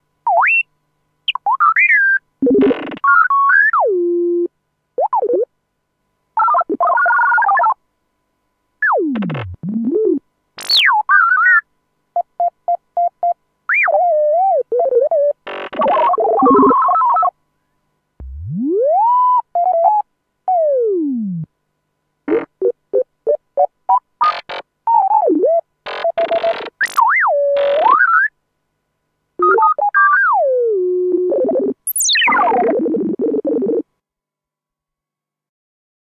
an emulation of R2D2 speaking. From a roland Juno 6 analogue synth. all oscillators switched off, I'm just playing back random notes adjusting the filters. No processing or fx, recorded in ableton live 7
6, analogue, beep, blip, computer, juno, language, r2d2, roland, star, synth, wars